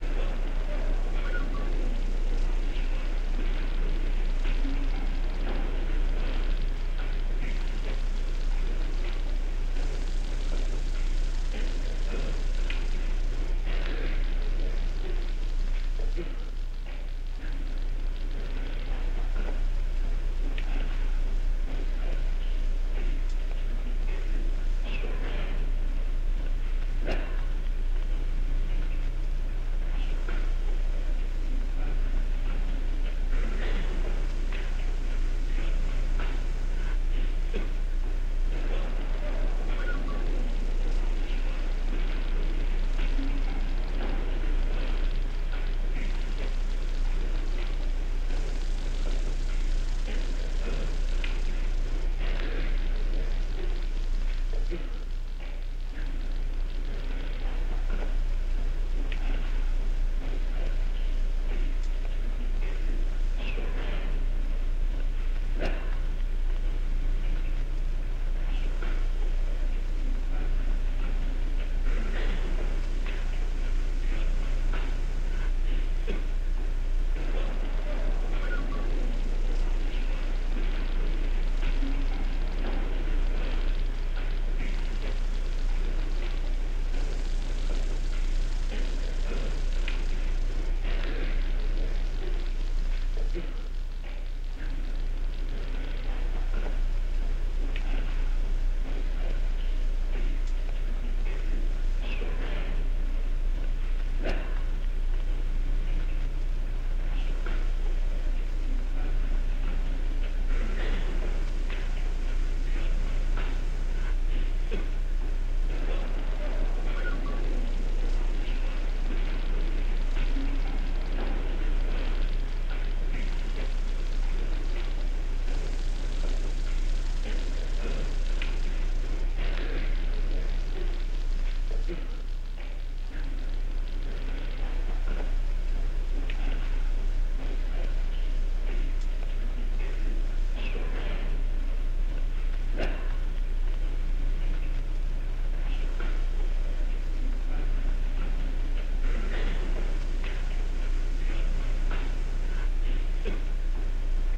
Old Radio Speech Background FF124
Background noise for an old radio broadcast speech
Background-noise, broadcast, radio